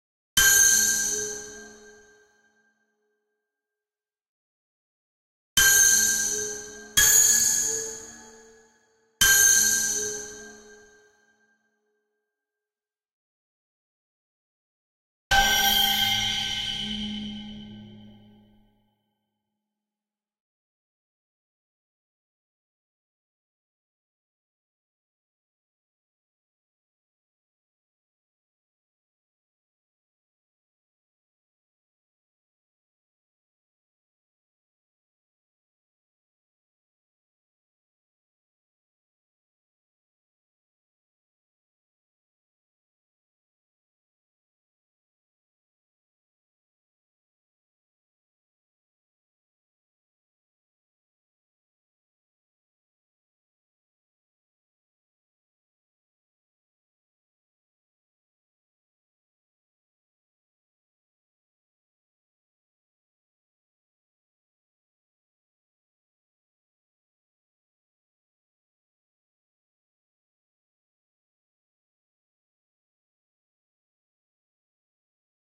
high pitch stab
Scary sound used to create high suspense
macabre, scary, anxious, bogey, phantom, creepy, hell, dramatic